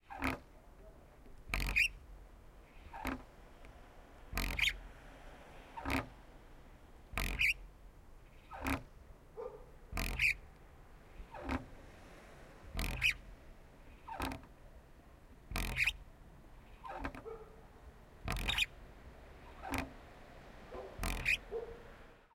Swing or seesaw from close
Swing on a small playground in a small town called Contra. In the background you hear a dog barking and a car passing.
Recorded in Ticino (Tessin), Switzerland.
children, field-recording, fieldrecording, kid, kids, park, playground, playing, seesaw, swing, swinging, town, village